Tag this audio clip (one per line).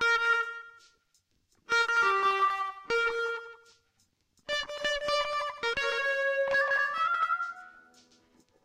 guitar
tube